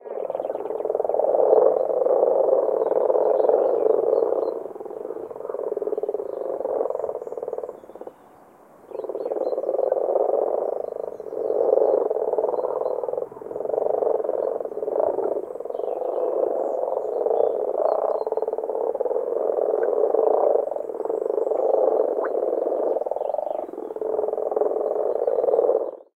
Frogs Croaking
A dual mono field-recording of several frogs (Rana temporaria) croaking at the beginning of the mating season. Rode NTG-2 > FEL battery pre-amp > Zoom H2 line in.
croak, croaking, field-recording, frogs, plop, rana-temporaria